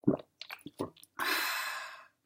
A person gulping down a liquid followed by a contented sigh. A combination of two sounds - recording my throat close to my computer's mic while drinking, and a satisfied exhale. Recorded with Ableton.